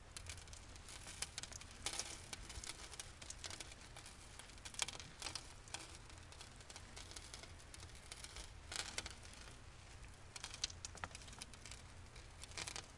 sand pour on wood FF668
sand, sand pour on wood, pour, pour on wood, wood